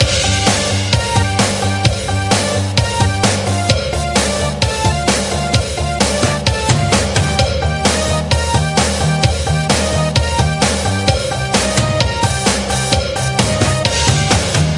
Loop Max Power 02
A music loop to be used in fast paced games with tons of action for creating an adrenaline rush and somewhat adaptive musical experience.
gaming, victory, indiedev, videogame, videogames, indiegamedev, games, war, battle, game, loop, Video-Game, music-loop, gamedeveloping, gamedev, music